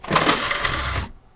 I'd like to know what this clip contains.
from drive2
data, disc, tray